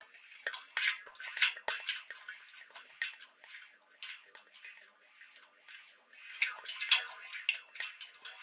dabble and plashing near a boat or breakwater
boat, buoj